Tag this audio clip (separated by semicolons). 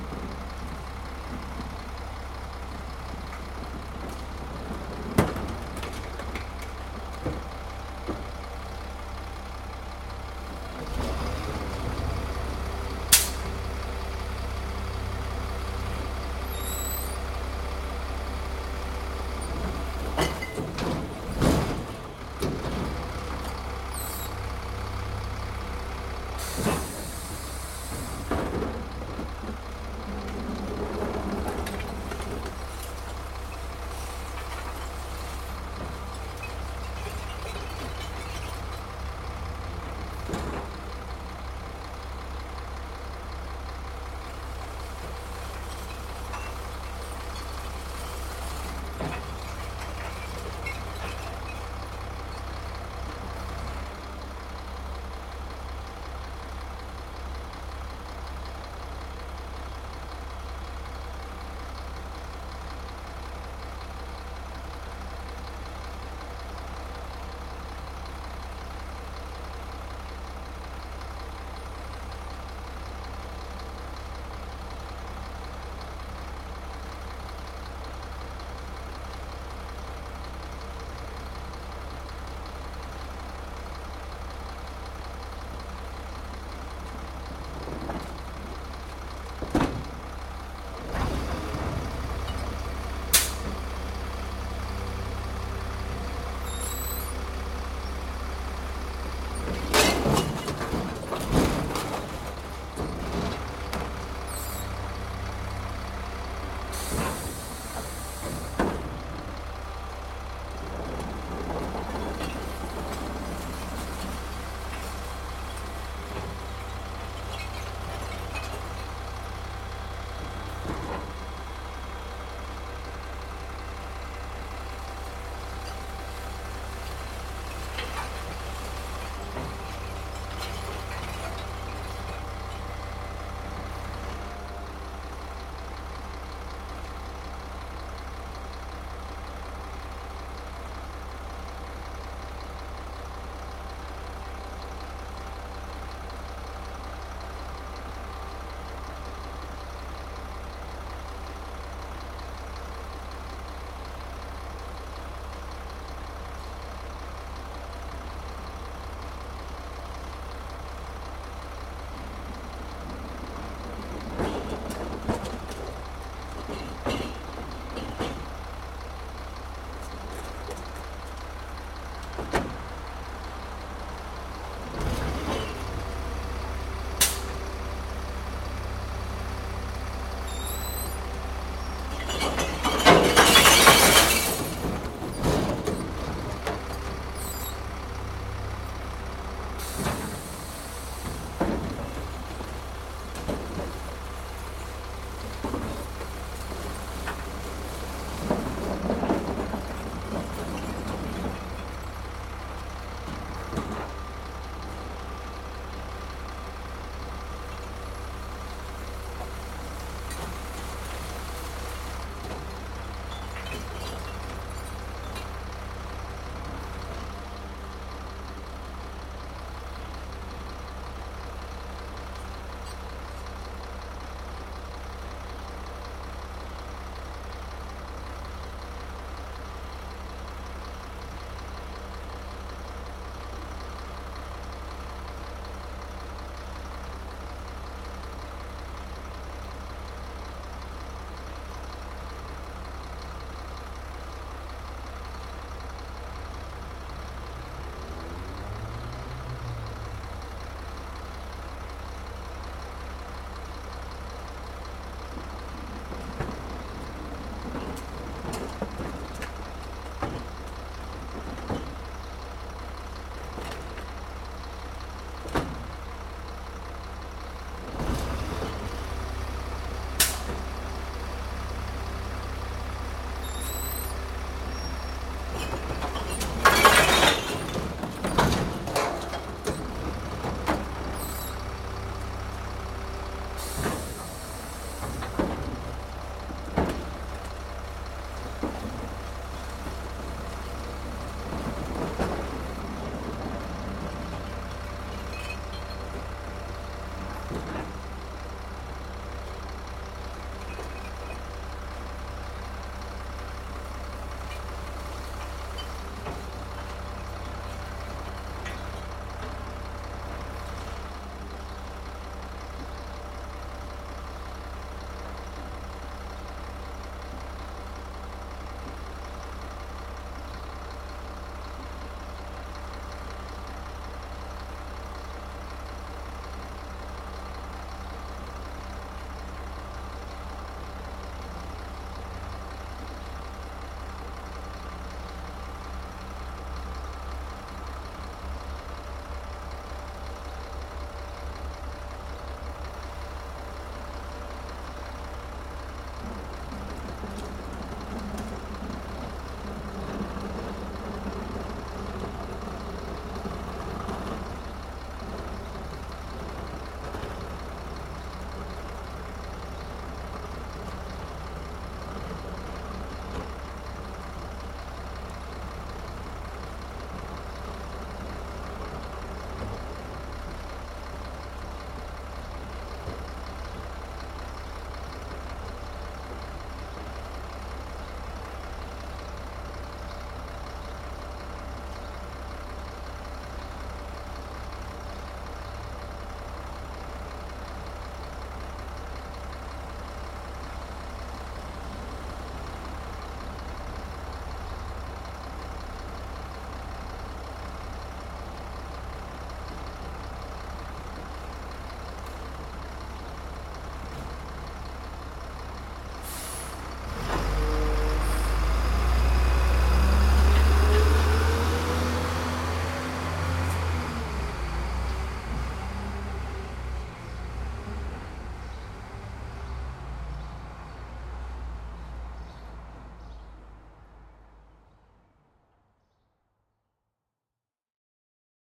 city; crash; drive; glass; truck; vehicle